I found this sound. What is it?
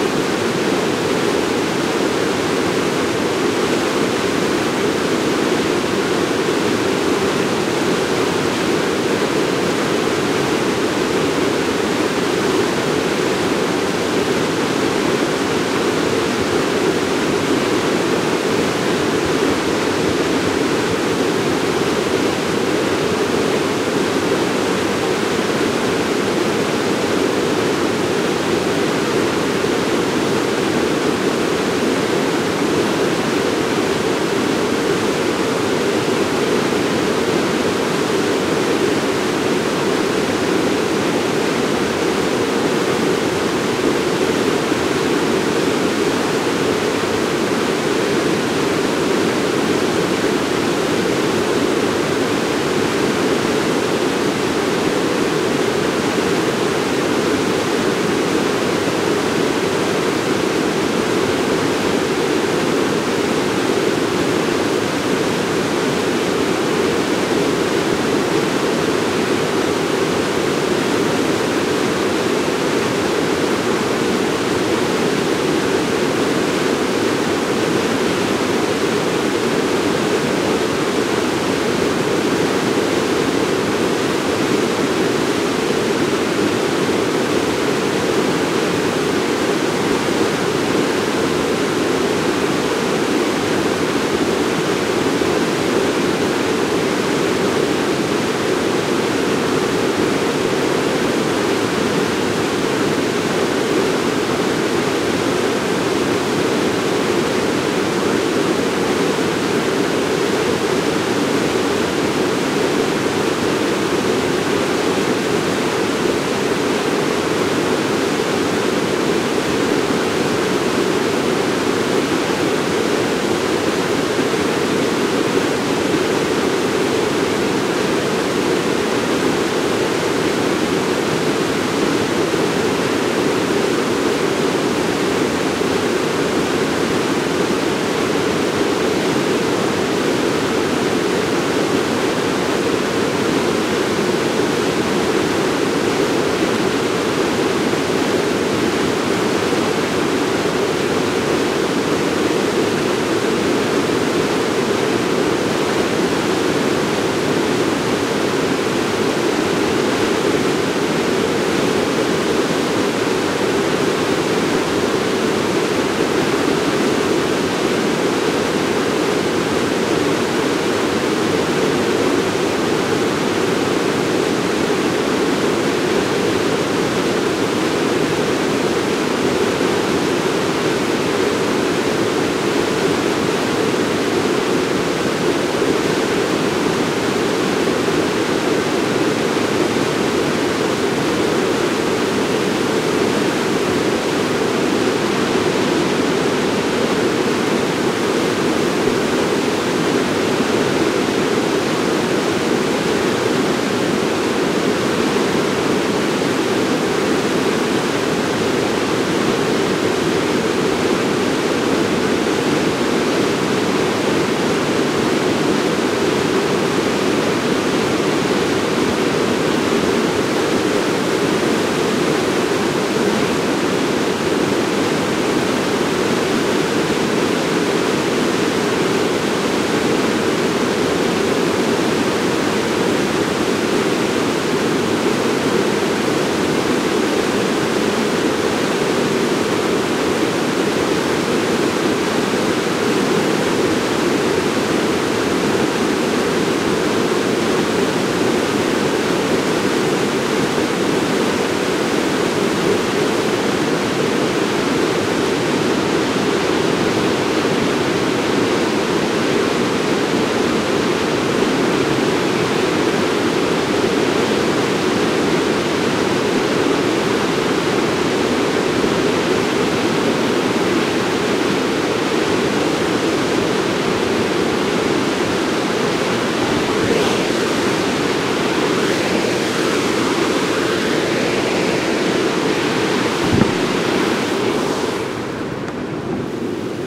Tunnel Falls Bridge Dangle raw
Recorded at Eagle Creek trail on Tascam HDP2 using a Sterling Audio ST31 microphone.
stream, waterfall, oregon, field-recording, Nature